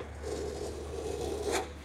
Scraping a metal bench with keys. A typical metal on metal sound.
bench, contact, keys, metal, scraping